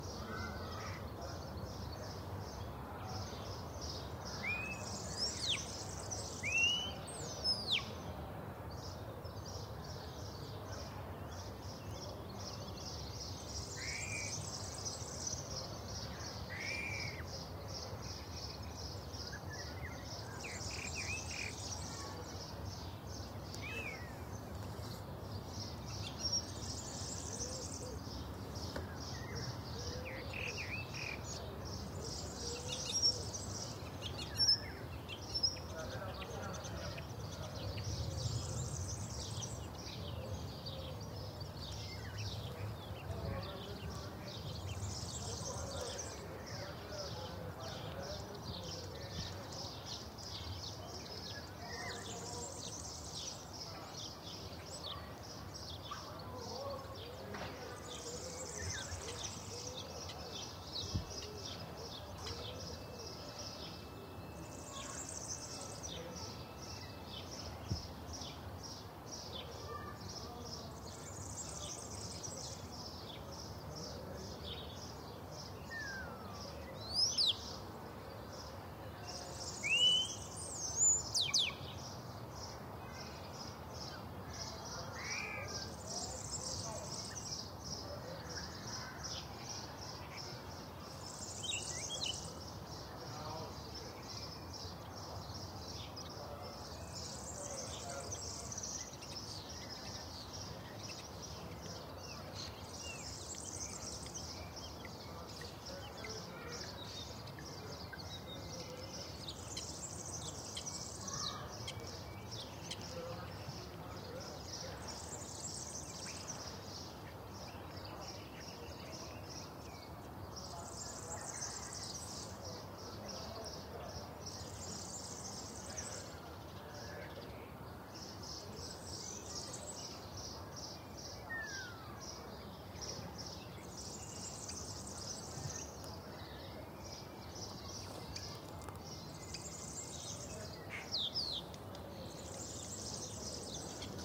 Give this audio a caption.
Many birds singing with highway sound in the background and a few people talking